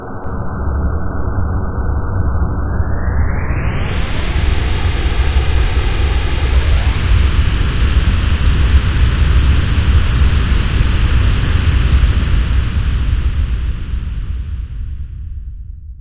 Space ship taking off
Sound created entirely in Adobe Audition
takeoff, jet, plane, engine, rocket, launch, space, spaceship